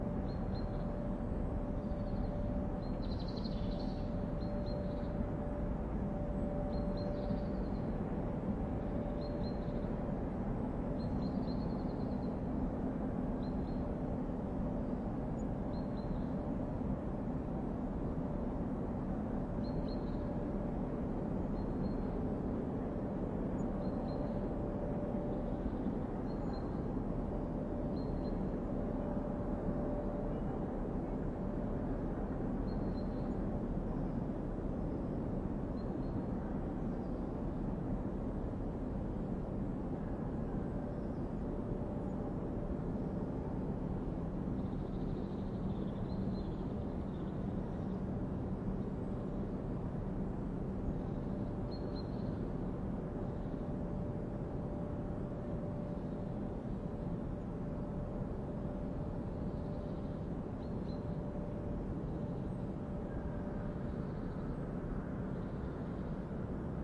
whitenoise birds
Field recording of distant industrial mine / factory, with birds nearby. Lazy summer noon.